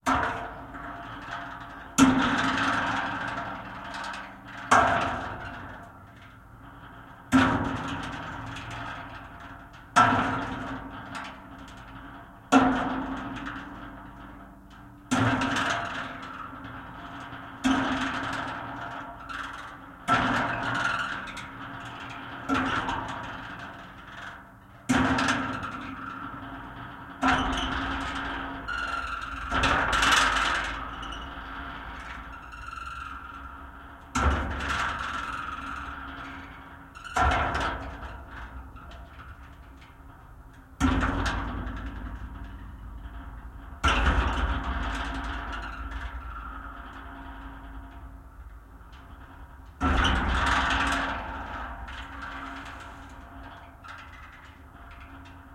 carousel playground piezo
a spinning metal carousel at the playground.
2x piezo-> piezo preamp-> PCM M10.
carousel, merry-go-round, piezo, platform, playground, recording, rotating, stereo